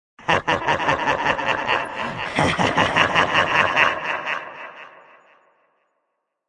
deep evil maniac crazy baddy cheesy laughter joker 2
baddy, cheesy, crazy, deep, evil, fantasy, joker, laughter, maniac, processed, scary, scifi